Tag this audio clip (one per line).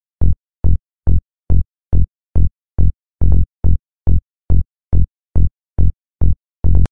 acid
bass
dance
electronica
trance